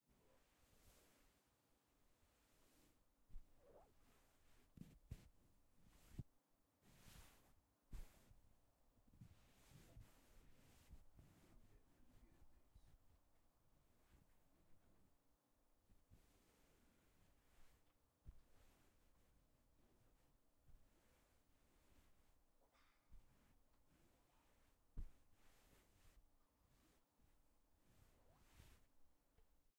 Rubbing cloth
Hands rubbing over cloth
fabric, rustle, rubbing, rub, cloth